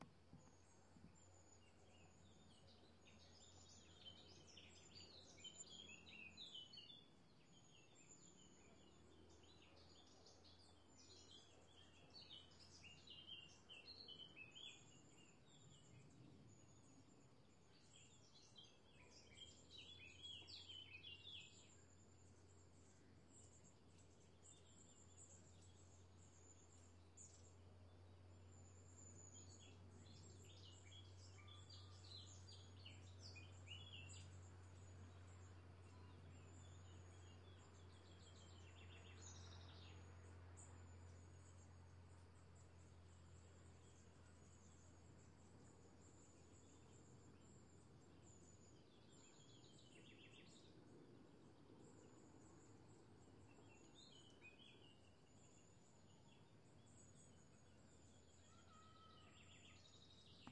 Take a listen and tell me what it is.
This is a recording of birds chirping in Parco della Mura in Genova, Italy.
birds, chirping, park